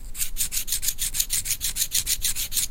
A thin file on a bassoon reed with a metal plaque that makes a different metallic sound.